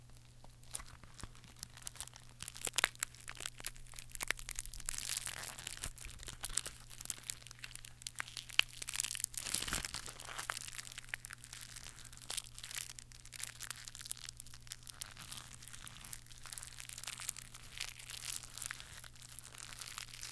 cigarette pack2
crumpling the empty cigarette pack
MTC500-M002-s14, cigarette